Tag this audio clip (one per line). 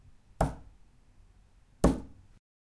hit
table
wood